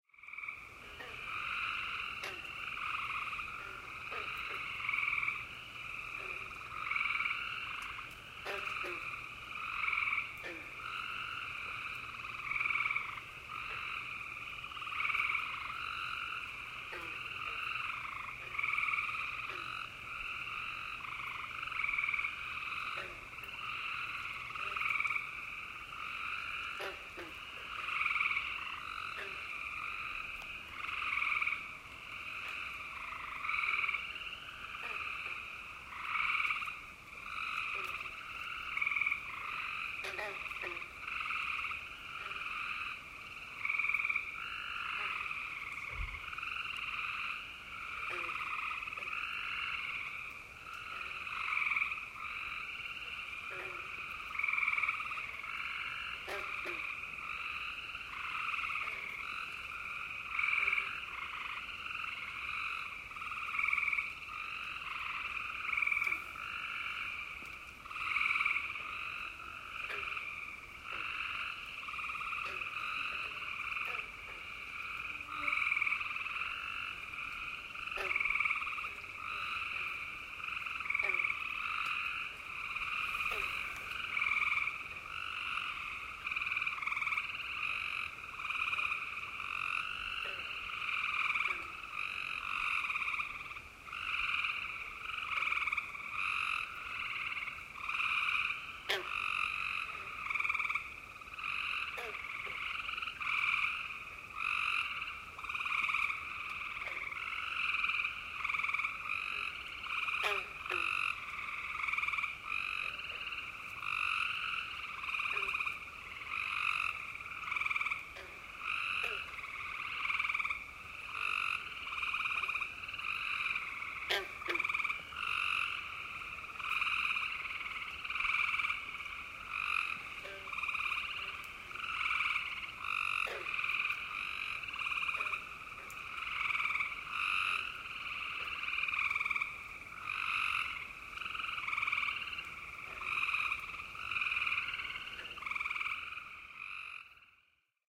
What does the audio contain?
Several different species of frogs croaking and singing on a pond in central Wisconsin in June. Two clips stitched together smoothly so you shouldn't be able to hear where they are joined. Recorded with a Tascam DR-40.
toads, lake, amphibians, frogs, pond, wetland, swamp, wisconsin